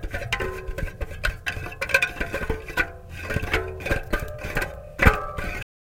Fingers on Tire Spokes
Fingers gently touching spokes of spinning bike tire
bicycle, bike, fingers, spinning, spinning-tire, spokes, tire